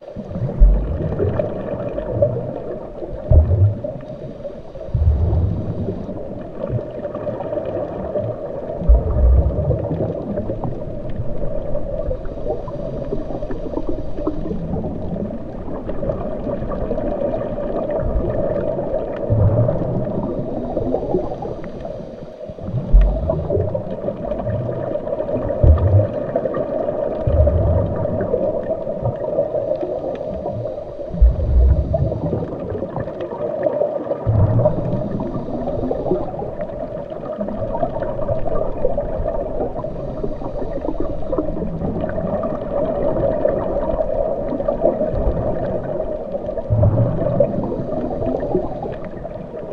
I used several Freesounder's fab files to create this one:
274491__timsc__under-water-sounds-while-scuba-diving
147187__hampusnoren__under-water-bubbling
147182__hampusnoren__under-water-splash-7